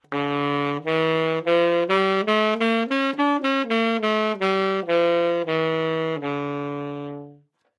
Sax Tenor - D minor - scale-bad-rithm-staccato-minor-harmonic
Part of the Good-sounds dataset of monophonic instrumental sounds.
instrument::sax_tenor
note::D
good-sounds-id::6243
mode::harmonic minor
Intentionally played as an example of scale-bad-rithm-staccato-minor-harmonic